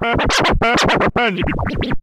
I thought the mouse "touchpad" of the laptop would be better for scratching using analog x's scratch program and I was correct. I meticulously cut the session into highly loopable and mostly unprocessed sections suitable for spreading across the keyboard in a sampler. Some have some delay effects and all were edited in cooledit 96.
dj, hip-hop, loop, rap, scratch, turntable, vinyl